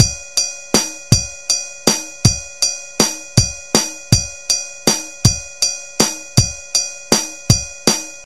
A drum pattern in 11/8 time. Decided to make an entire pack up.
08, 11, 11-08, 11-8, 8, drum, full, kit, pattern